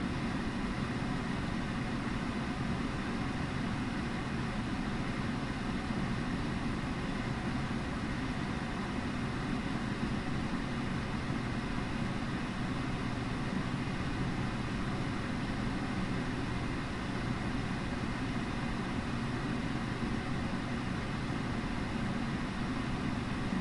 computer far
A recording of my PC from about 60cm away. Edited so it loops nicely.
Recorded using a Zoom H1 with the built in stereo microphones.